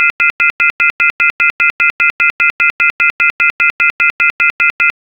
Off-hook tone
The sound the phones made in the USA when you left the phone off the hook for too long.
dial, dtmf, tone